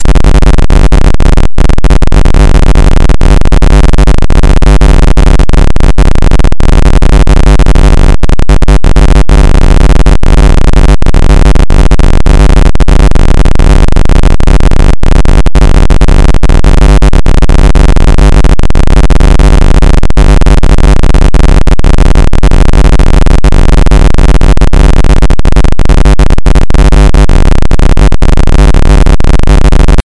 25 LFClipNoise 100Hz
This kind of noise randomly generates the values -1 or +1 at a given rate per second. This number is the frequency. In this example the frequency is 100 Hz. The algorithm for this noise was created two years ago by myself in C++, as an imitation of noise generators in SuperCollider 2.
clip, clipnoise, digital, noise